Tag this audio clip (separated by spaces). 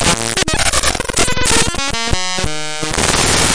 abstract digital electronic future glitch lo-fi noise noisy sound-design strange weird